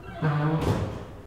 door close 01
The booming slam of a door. Recorded in high reverb environment. Could need some dampening.